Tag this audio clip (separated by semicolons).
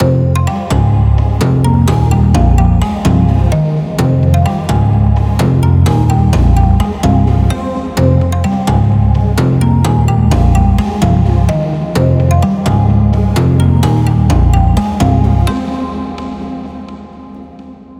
magical; Game